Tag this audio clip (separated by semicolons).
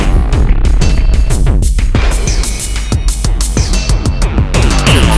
acid; breakbeat; drumloops; drums; electro; electronica; experimental; extreme; glitch; hardcore; idm; processed; rythms; sliced